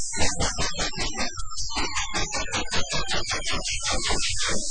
[AudioPaint] mysterious audio scripts
Created with AudioPaint from an old photo of my notebook with a silly unended story. I hope you don’t parse the words and contemplate on weird audio bits instead. :D
abstract, audiopaint, effect, electronic, image, image-to-sound, rhytmic, weird